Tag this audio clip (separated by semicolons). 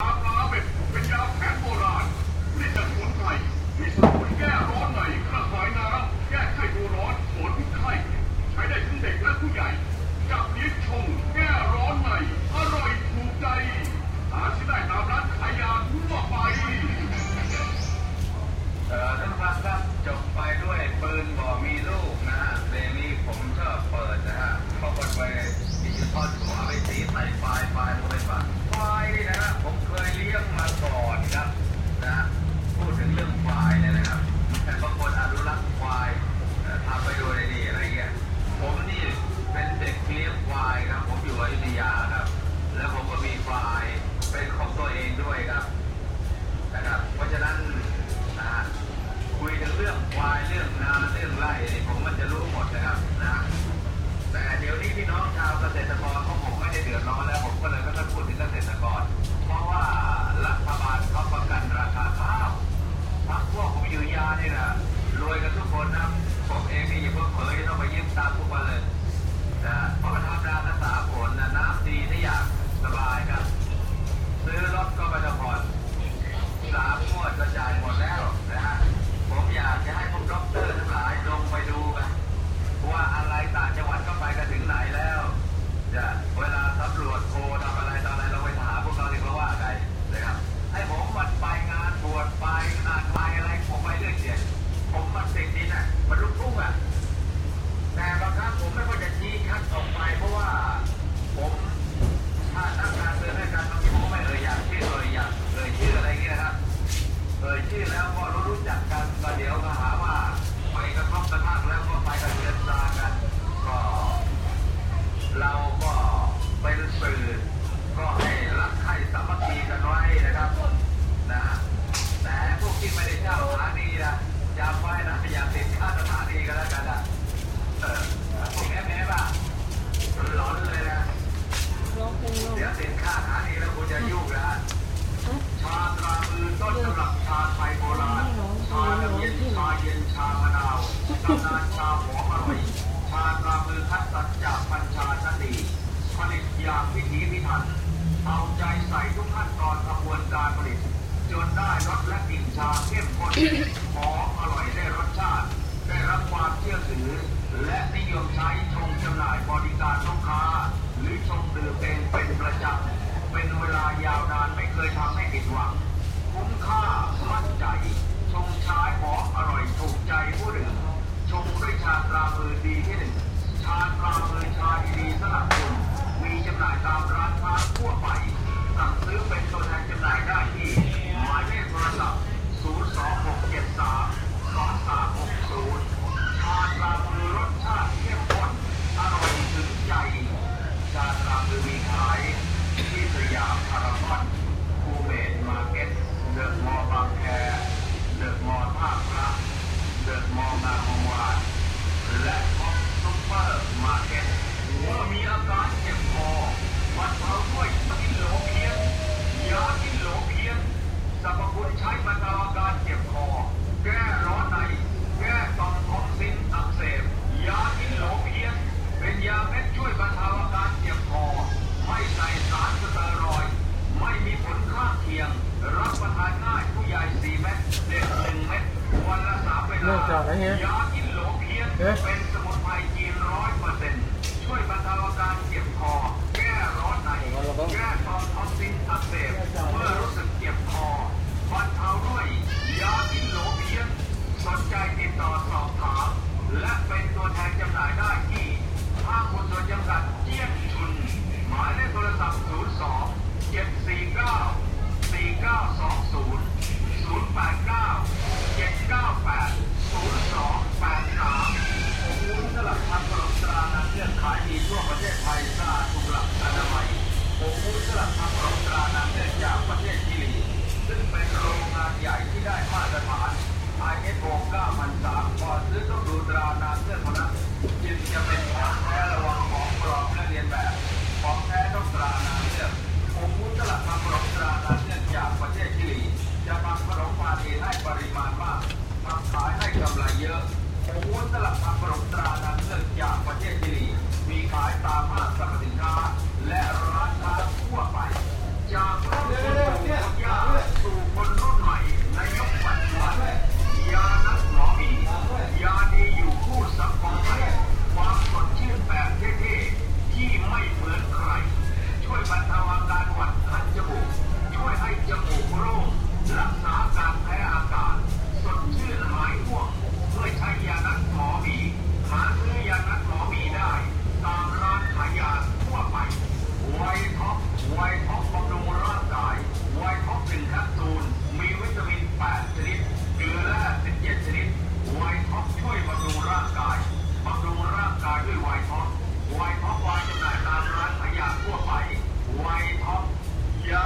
radio; contruction